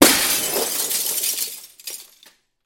Windows being broken with vaitous objects. Also includes scratching.
break, indoor, window, breaking-glass